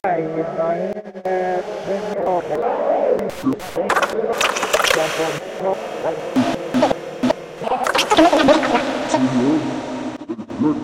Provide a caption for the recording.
Radically cut up voice samples
concrete, edits, industrial, music, tape, ups